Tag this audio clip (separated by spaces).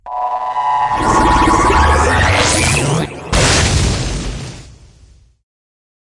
broadcasting Fx Sound